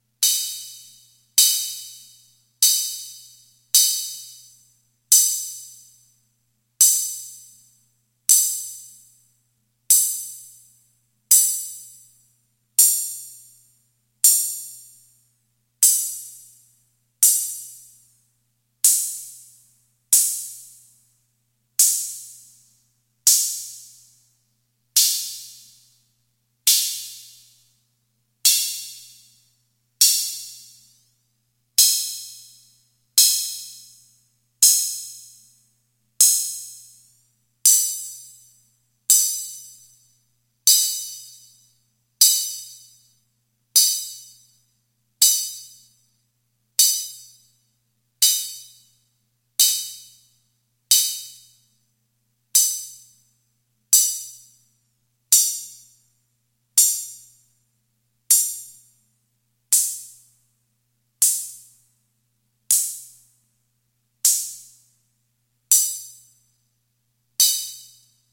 HH Open
1983 Atlantex MPC analog Drum Machine open hi hat sounds
1983, drum, hihat, mpc, open